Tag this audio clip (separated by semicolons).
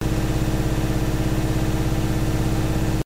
field-recording; high-quality